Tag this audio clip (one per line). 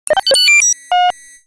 blip
computer
sound